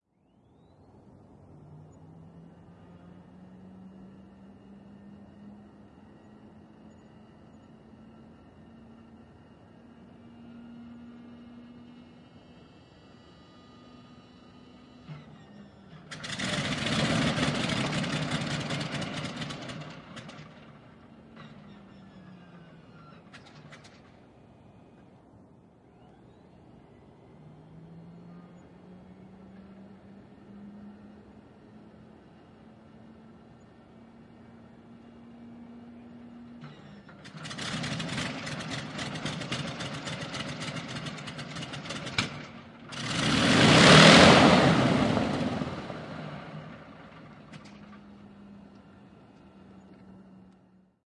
P-40C - Allison Startup
This is an audio clip of a Curtiss P-40C trying to start up its Allison engine on a cold winter morning.
airplane, Allison, military, Startup, V12, war, Warhawk